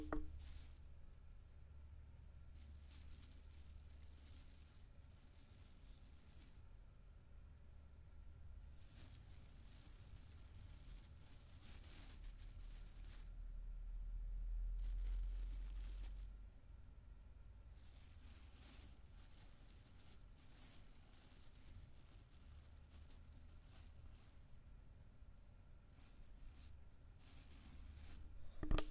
plastic bag rustling